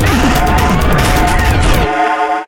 Turbo Soup
Ambient noise loops, sequenced with multiple loops and other sounds processed individually, then mixed down and sent to another round of processing. Try them with time stretching and pitch shifting.